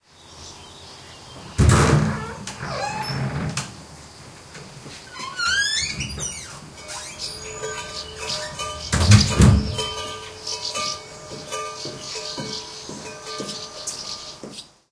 20210624.wooden.door.135
Large wooden door rubs and squeaks, then some footsteps, cowbell and birdchirps in background. Matched Stereo Pair (Clippy XLR, by FEL Communications Ltd) into Sound Devices Mixpre-3. Recorded at Mudá (Palencia province, north Spain).